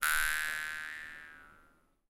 jewharp recorded using MC-907 microphone